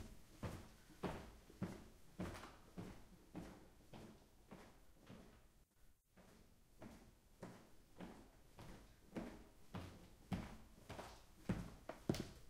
Walking away and returning boots on hardwood floor
Walking away from mic 10 paces and returning. Boots on wood floor. Recorded in studio (clean recording)